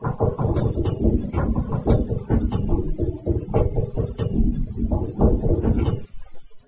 Created from this sound:
Seamless loop created on my second session trying to find nice useable loops in this sample.
Loops seamlessly at 143.66 BMP.